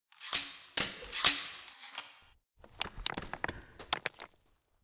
Several crossbows fire and the arrows hit a rocky surface.